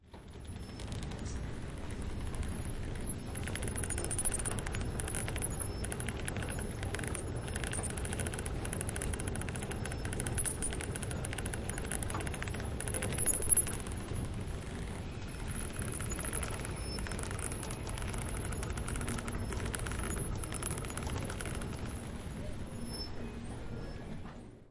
Mechanic stairs noise in London Underground
Close recording of an interesting noise made by mechanic stairs in one (don't remember which) station of the London Underground. Recorded with a Zoom h4n with 90º stereo spread on April 2014.
mechanic, escalator, london-underground, stairs, mechanic-stairs, squeaking, noise